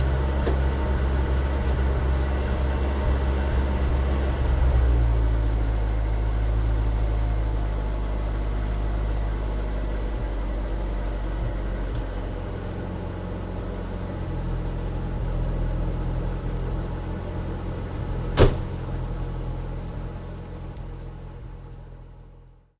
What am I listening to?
Car engine running 3
automobile, car, close, closing, door, doors, drive, engine, idle, ignition, lock, machine, motor, open, opening, racing, reverb, shut, shutting, slam, slamming, start, starting, vehicle, vroom